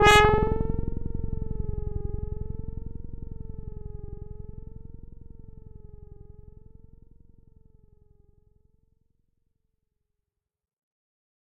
This sample was created using a Moog Sub Phatty and recorded into Ableton Live.
modulation, synthesis, effect, additive, synth, sound-design, sfx, fx, distorted, noise, digital, distortion